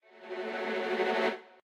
Tremolo Strings 2
A tremolo crescendo made in Logic Pro X.
I'd love to see it!
cinematic, creepy, crescendo, dark, haunted, horror, melodic, moment, music, spooky, stab, sting, strings, suspense, transition, tremolo